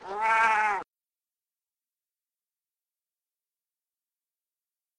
Siamese cat meow 3
animals, cat, meow, siamese